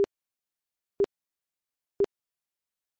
400hz bleeps @-18dBFS 3 Second
400hz bleeps @ -18dBFS 3 Seconds
LINE, BLEEPS, UP